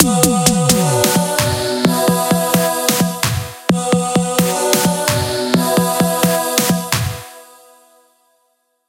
Cinematic 80s Beat
80s Dance Pop
130bpm Key D
Vocal Synth Drums
8 seconds
Cinematic
Not required but if you use this in a project I would love to know! Please send me a link.
vocal-synth, cinematic, 80s